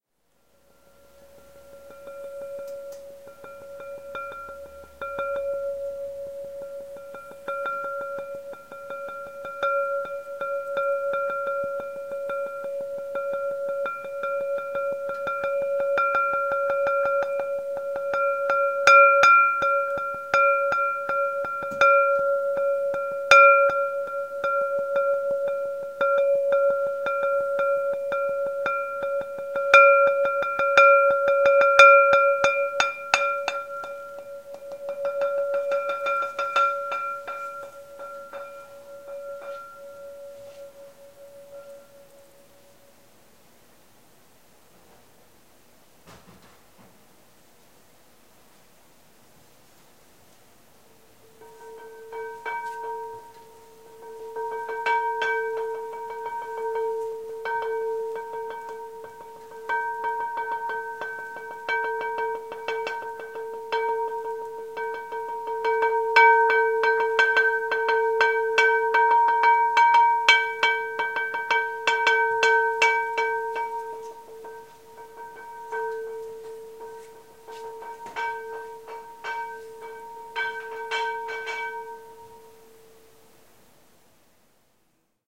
a percussed ceramic bowl